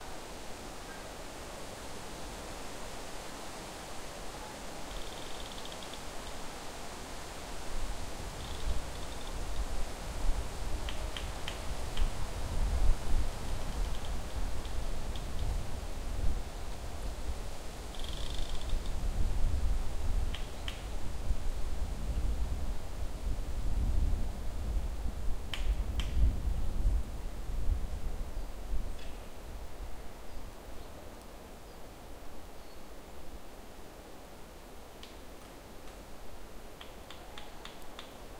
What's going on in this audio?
Early autumn forest. Noise. Wind in the trees.
Recorded: 2013-09-15.
XY-stereo.
Recorder: Tascam DR-40
forest, wind, ambient, nature, field-recording, soundscape, noise, trees, ambience, atmosphere, ambiance